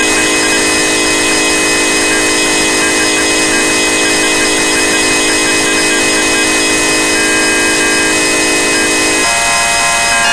circuitbent Yamaha PSR-12 loop9
bent, circuit, psr-12, sample, yamaha